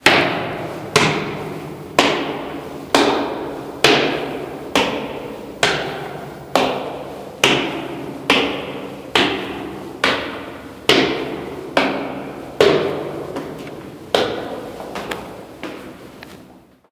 Reverby stairs
Slow deliberate walk up a set a concrete stairs in a concrete stairwell. Lots of reverb. Sounds a little ominous, like someone is coming to get you. Recorded indoors on a Samsung Galaxy S3 using RecForge.
stairs, steps, foot, feet, concrete, space, horror, floor, footsteps, walking, walk